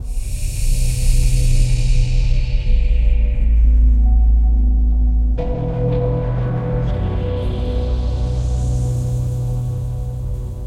Synth Loop 6 - (90bpm)
Ambient, Piano, Cinematic, Atmosphere, Drone, Pad, Sound-Design, Drums, Loop, synth, commercial, Looping